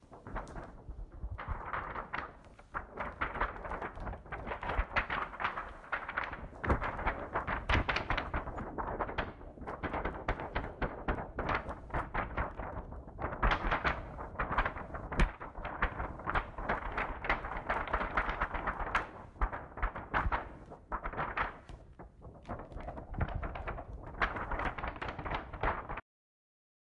This is the sound of laminated paper moving.